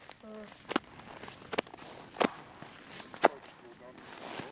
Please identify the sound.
fish slaps on snow writhing after caught and picked up and drop plop
caught, fish, flop, slaps, snow